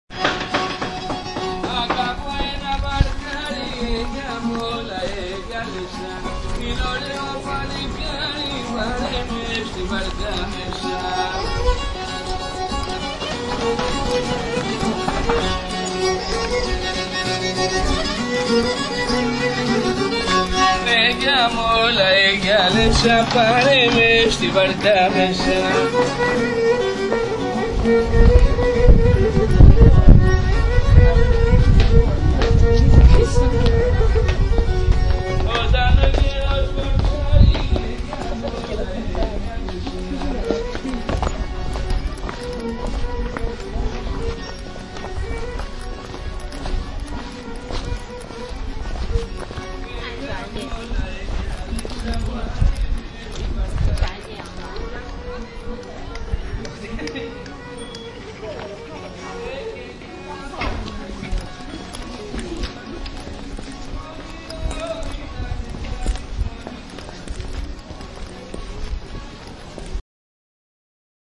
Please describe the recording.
athens street musicians
Athens, street musicians